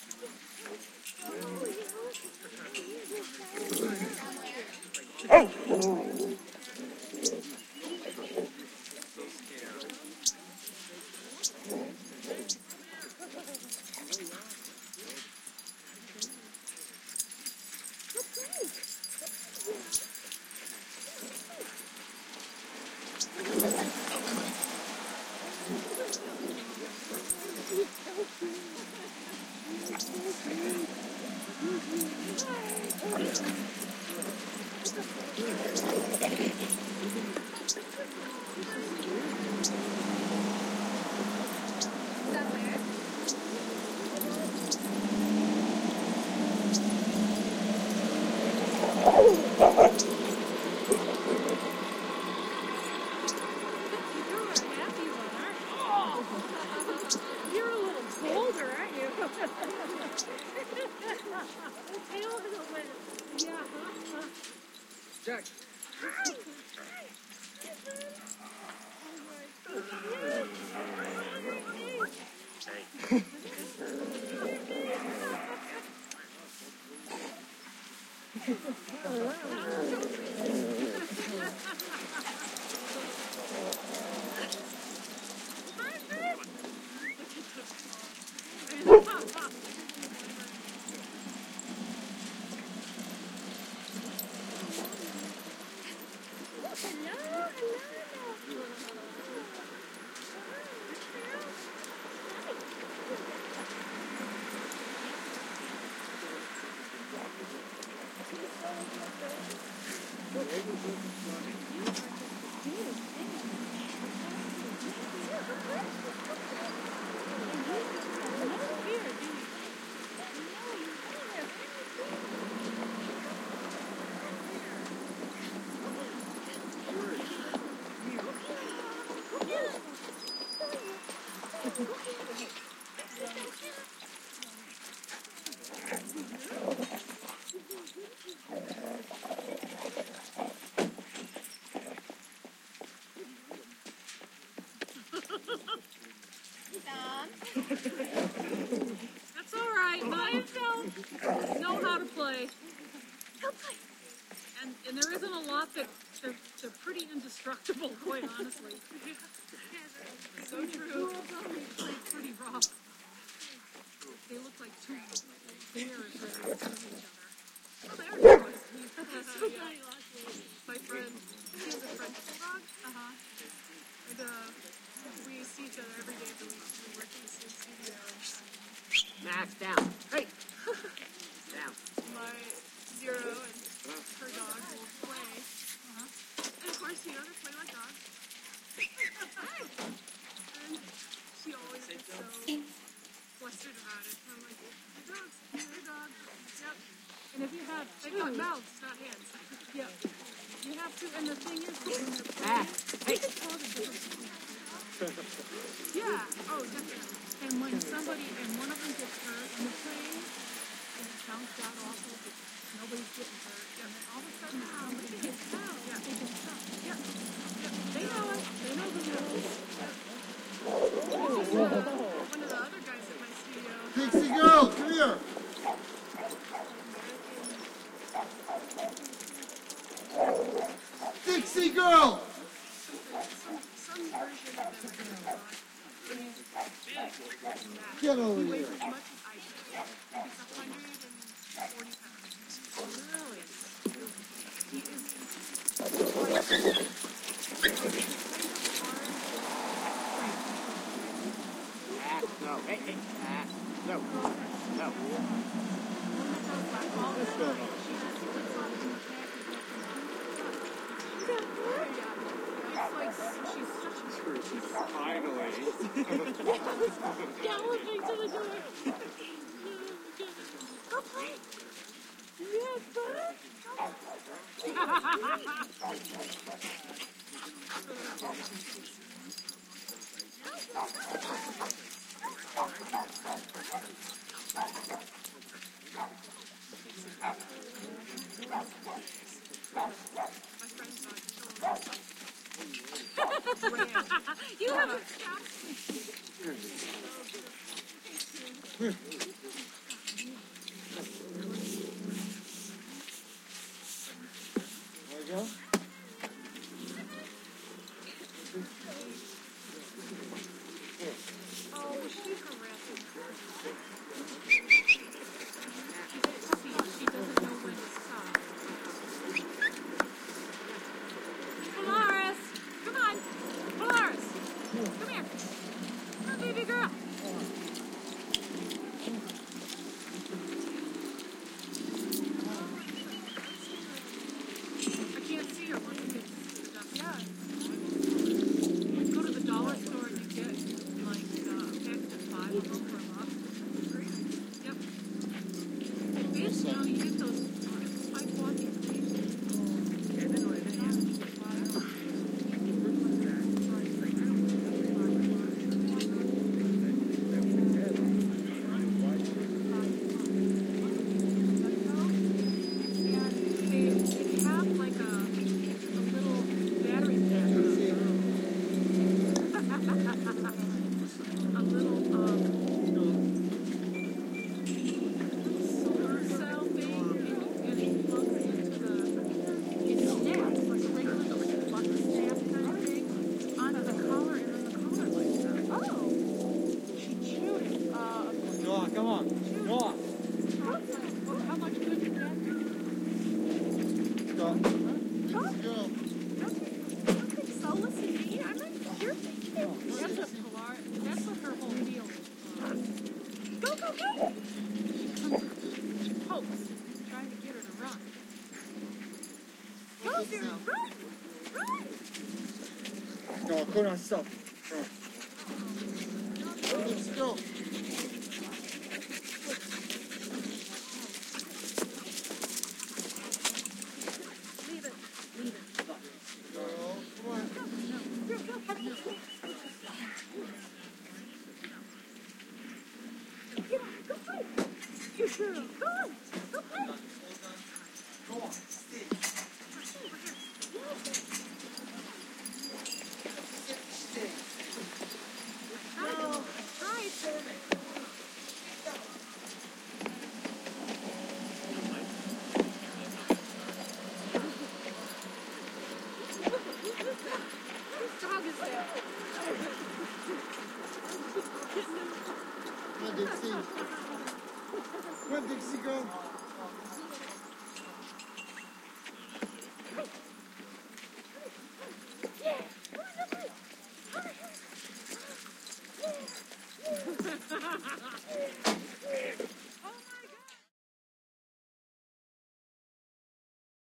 AMB S Dog-Park Busy 005
I recorded a busy dog park in Los Angeles from several different angles. Lots of dogs playing and barking. Lots of owners calling out, etc.
Recorded with: Sound Devices 702t, Beyer Dynamic MC930 mics
ambiance, barking, city, dog-park, dogs, people, pets, playing, walla